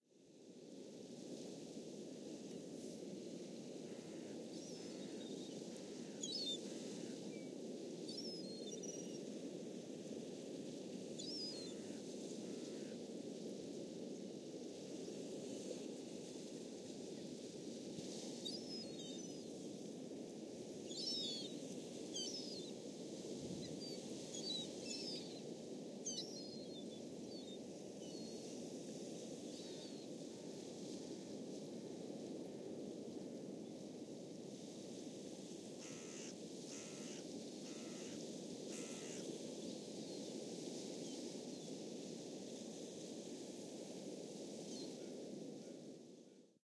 sea-seagulls-crows-windfilter
Recording of the sandy sea shore in the Netherlands, Sound of waves, seagulls with a highpass filter for the wind.
I fed the seagulls and crows with bread in the hope they would scream, which they did.
seagulls, water, sandy, sea, wave, scheveningen, shore, waves, northsea, crow, seagull, crows, netherlands, wind